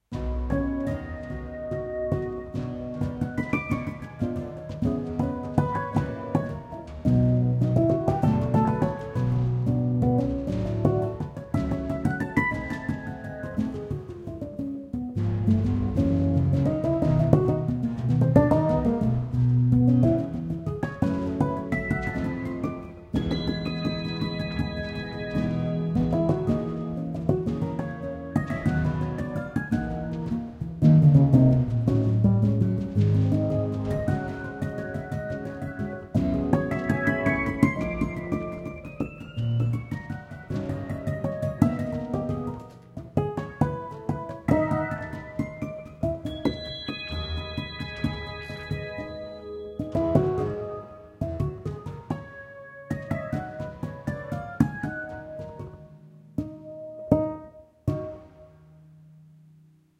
Love in the Bar - Jazz Piano